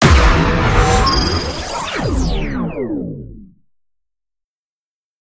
Radio Imaging Element
Sound Design Studio for Animation, GroundBIRD, Sheffield.
imaging, splitter, bed, bumper, wipe, sting, radio